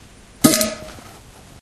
toilet fart 10
fart poot gas flatulence flatulation explosion noise
explosion, fart, flatulation, flatulence, gas, noise, poot